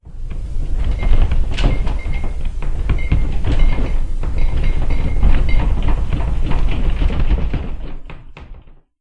Small Earthquake Indoors Sound Effect
It was basically recorded by me, shaking my table and then applying a lower pitch and more bass to it. I'm quite happy with the result.